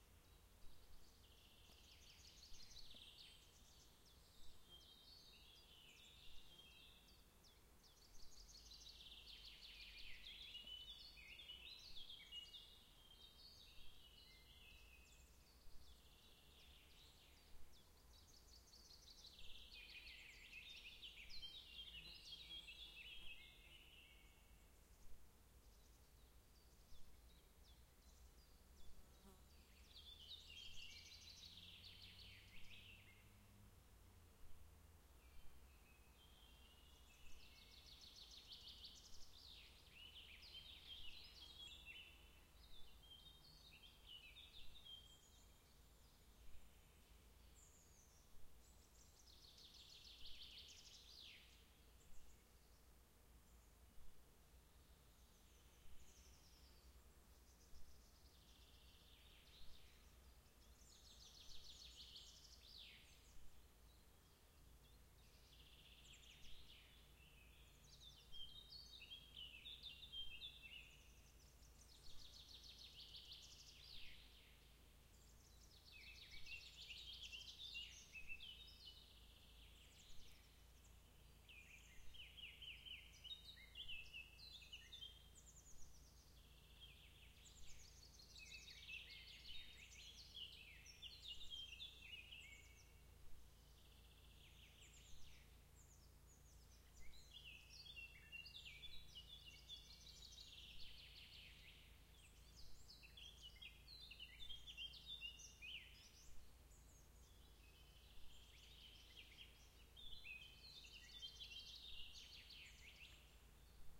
ATM-LES-CIST-1,50
Pine forest ambient from Czech. Recorded in summer with ZOOM H4n.
ambient, birds, czech, forest, insects, pine, summer, wind